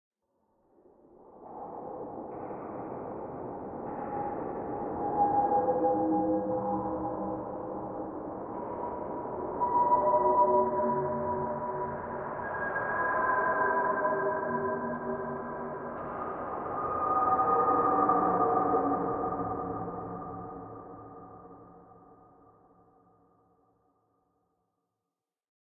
SRS Horror Tonal Ambient Ghosts
A rusted gate from Goa, India thrown into a sampler and processed in Ableton Live.
Ambient, Horror, Paranormal, Thriller